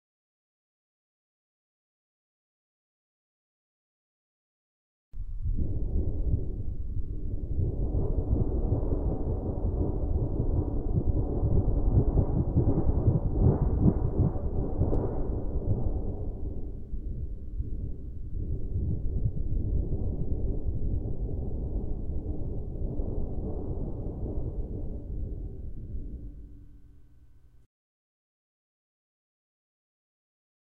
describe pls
A stereo recording of a 4' x 4' piece of sheet metal shaking to create a low end metallic rumble.
Stereo Matched Oktava MC-012 Cardioid XY Array.